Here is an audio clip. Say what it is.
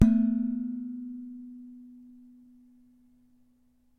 Hitting a large pot lid
bang, hit, kitchen, lid, metal, pot